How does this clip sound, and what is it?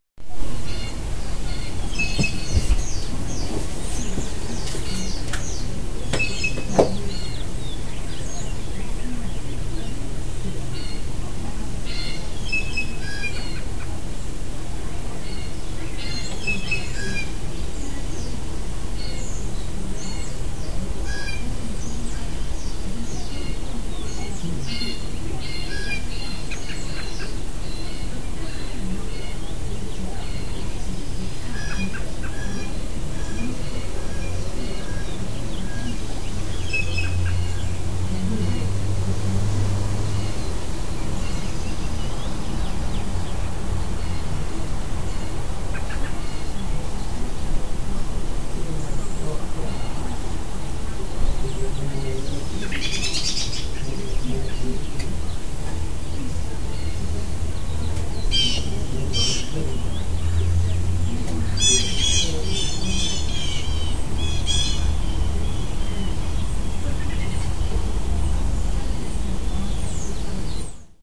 Afternoon Bluejays 04-18-2016
Bluejays and a robin calling this cloudy afternoon. They were going crazy about something, but I only recorded the tail end of it.
birds, small-town, Atchison, bird, nature, town, bluejays, field-recording, jay, birdcalls, spring